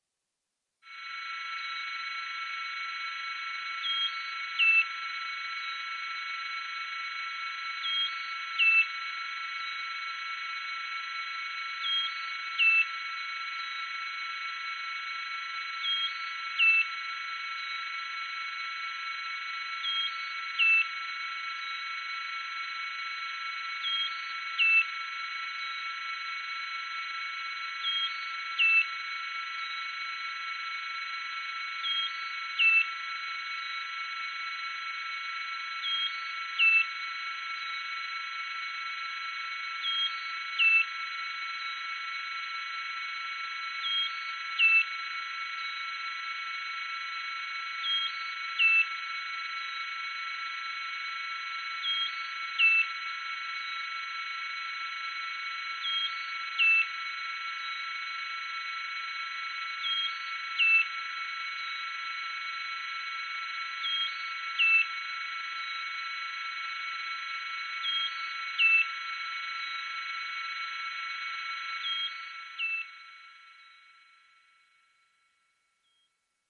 orbital bg9
ambience, ambient, atmosphere, background, bridge, dark, deep, drive, drone, effect, electronic, emergency, energy, engine, future, futuristic, fx, hover, impulsion, machine, noise, pad, Room, rumble, sci-fi, sound-design, soundscape, space, spaceship, starship